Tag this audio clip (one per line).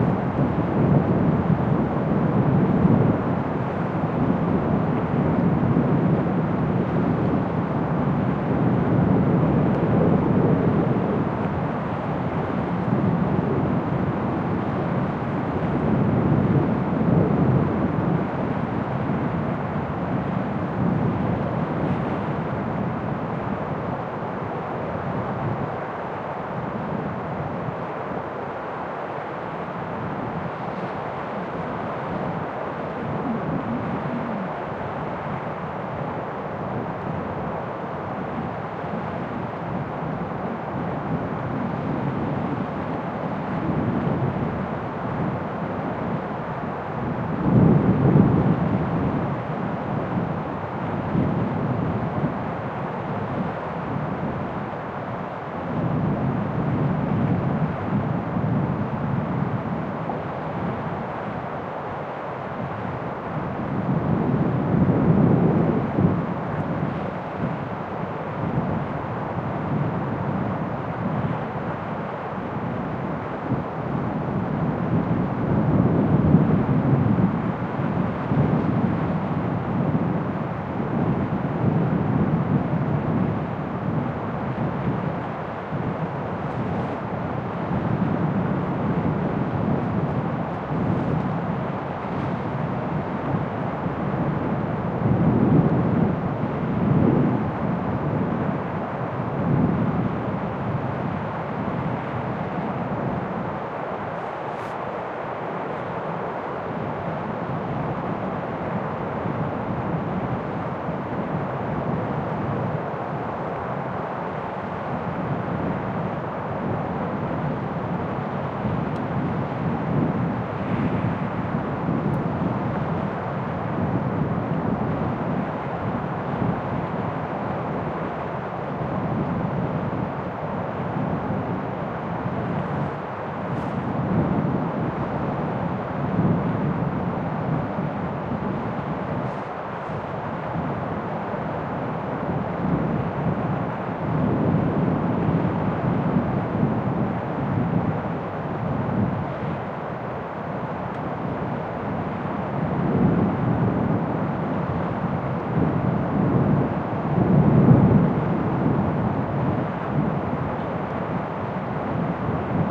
tone
wind
air
arctic
cold
winter